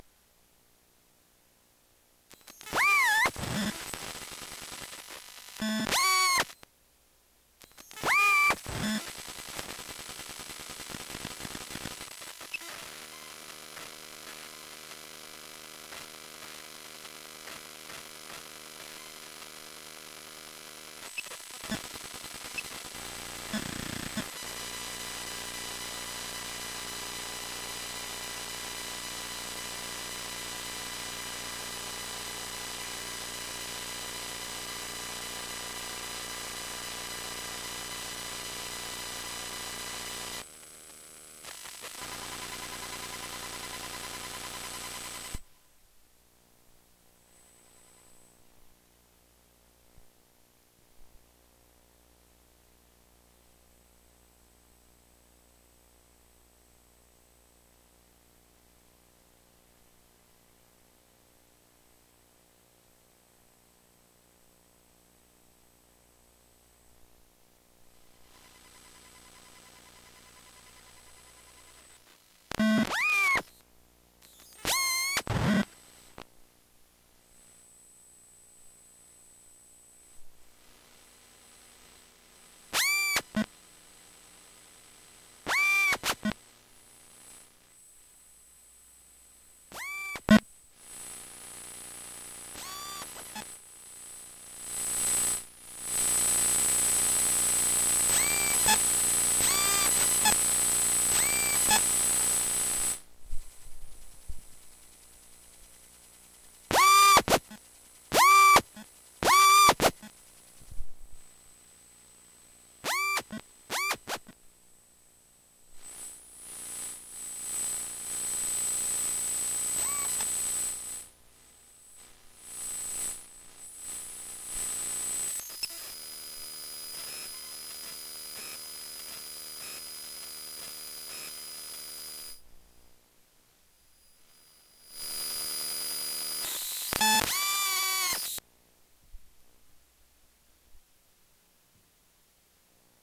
Phone pick up device stuck to various parts of digital camera during various functions, mostly filming video turning off and on selecting media.
buzz, electromagnetic, click, digital, radiation, camera